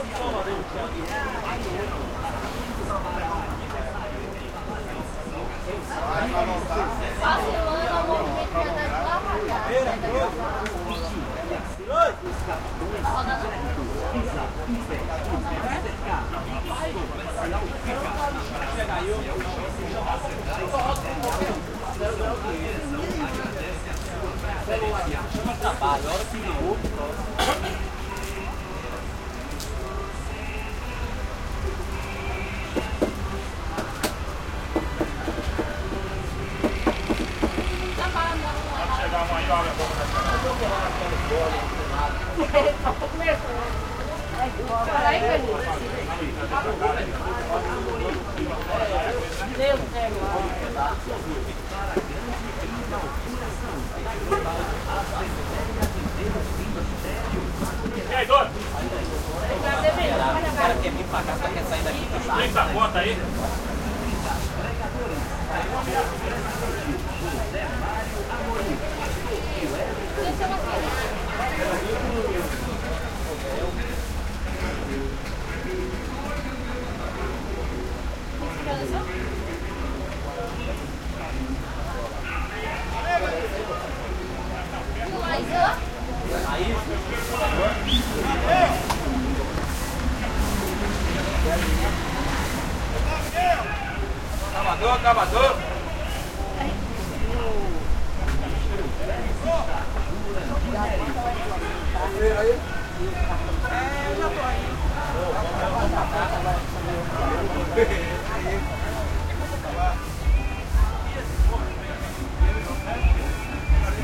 160609 09 Ponto da Linhas Alternativas
Longitude: - 38.96740347
Latitude: - 12.60202748
Elevação: 10 m
Local: Ponto das Topique em frente a Guaimbi
Bairro: Centro
Data: 10\06\16
Hora: 10:21
Descrição: Ponto das Topiques
Gravador: Sony D50
Tags (palavras-chave): Cachoeira Ponto das Topiques em frente a Guaimbi
Duração: 01:54
Autor: Gilmário e Wesley
cachoeira, estaciones, brazil, terminal, linea